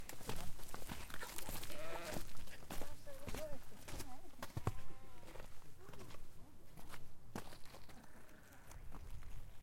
walking on path to sheep
Walking on track towards field of sheep.
ambience, farm, farm-track, field-recording, people, rural, sheep, walking